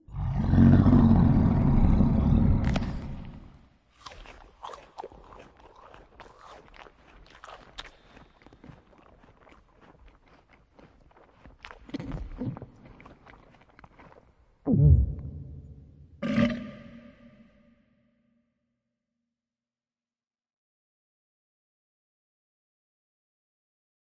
monster roar and eat
eat, creature, growl, monster